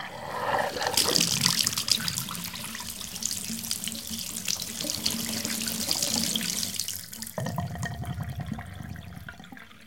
A bath with a gurgle